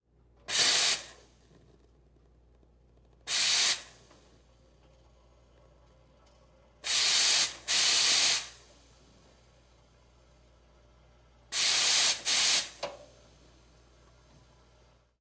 Cappuccino coffee steamer dry blast clearing nozzle - 02
various steam blast cleaning out moister from steam wand
blast, Cappuccino, espresso-machine, steam